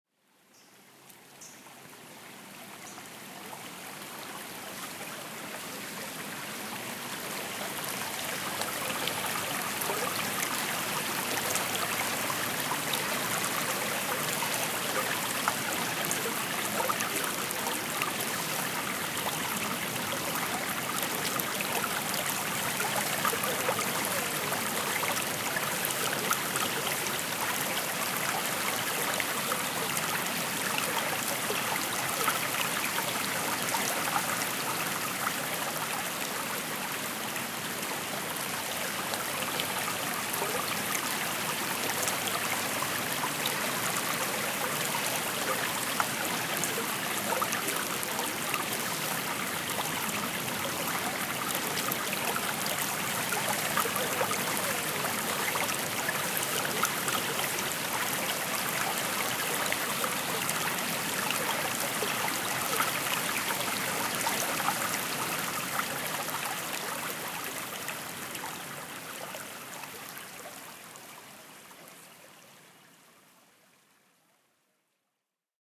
light stream with close up bubbling